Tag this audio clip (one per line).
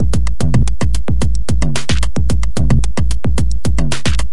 operator
beat
cheap
drums
111bpm
distortion
pocket
mxr
engineering
rhythm
drum
percussion-loop
PO-12
teenage
machine
drum-loop
Monday
loop